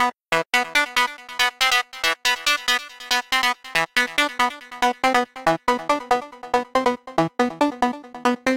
TR LOOP 0407
loop psy psy-trance psytrance trance goatrance goa-trance goa